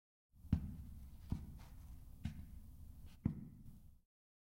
Footsteps on carpet 2
More footsteps on carpet